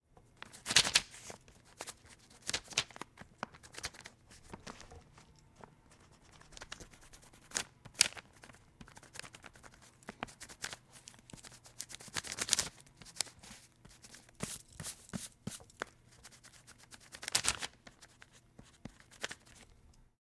Pencil erasing
Me erasing a lead drawing on paper.
pencil, erase, eraser, write, drawing, draw